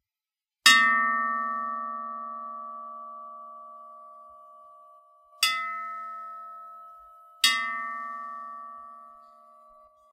metal impact, echo
Metal echo sound which i created just by hitting cauldron with metal spoon. Recorded on Blue Yeti.
hit, metal, echo, homemade, impact